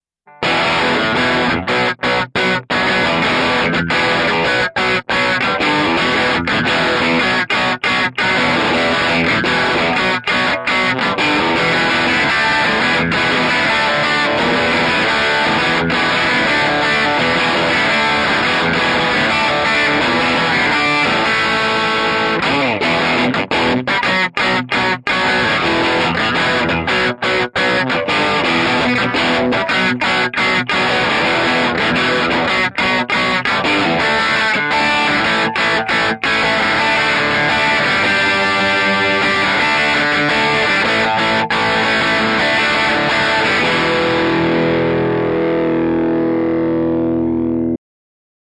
AC style E B D chords
nasty type of tones, think bad garage rock. But a simple type of riff which could be used for a beginning or break segment if shorten.
electric, bad, riff, hard, tones, noise, rock, simple, guitar, beginning, garage